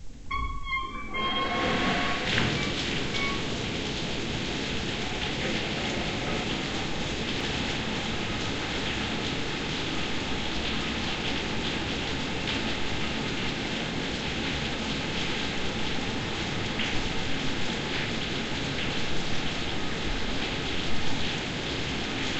Valve Turning- Water Rushing 2
Large valve opening and water rushing.